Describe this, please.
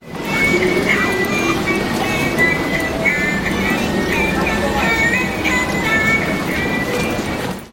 doll music